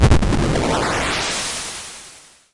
S/O to InspectorJ for moderating the 11 latest sounds!
Someone / something teleports! Or someone / something vanishes mysteriously!
If you enjoyed the sound, please STAR, COMMENT, SPREAD THE WORD!🗣 It really helps!